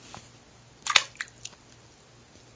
Wood falling into water.